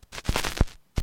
The stylus hitting the surface of a record, and then fitting into the groove.